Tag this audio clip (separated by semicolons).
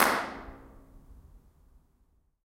ambiance; convolution; Impulse; IR; Response; Reverb; Tunnel